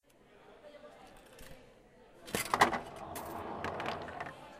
This sound is when someone plays “Futbolin” and the balls fall down in the tray.
campusupf, cafeteria, UPFCS12